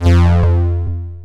Picking some up
sound, game, effects